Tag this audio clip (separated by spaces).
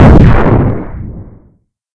explosion noise phaser white